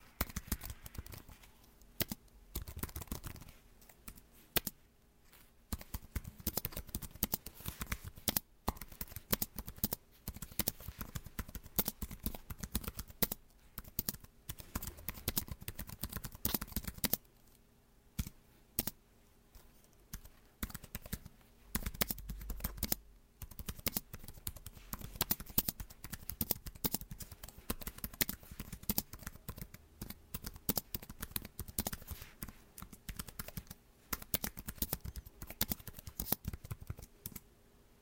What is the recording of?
Typing on keyboard.